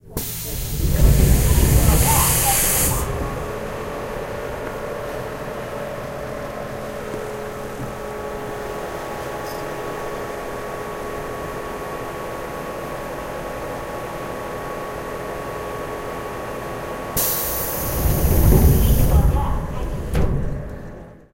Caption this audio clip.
0010 Metro door open close
Metro doors open close. Information doors open close in Korean
20120112
seoul, door, field-recording, metro, korean, korea, voice